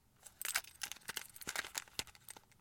Rummaging through Pockets
The sound of someone rummaging through a pocket, small box, etc. I made this sound by moving around a pile of keys, tic tacs, and my wallet. Recorded with Audio-Technica AT2035 mic. No audio enhancements added.
keys, pocket, shuffling